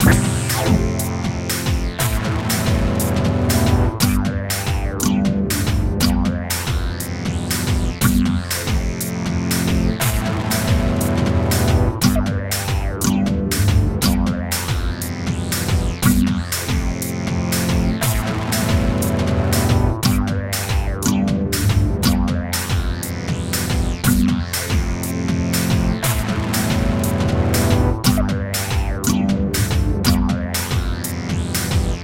Strange Theme
Theme for some video material, ending credits etc...?
rhythmic, theme